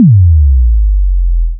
bassdrop03short
This is a simple but nice Bass-Drop. I hope, you like it and find it useful.
bass, bassdrop, drop, deep, frequency, low, sample, bass-drop, low-frequency, sine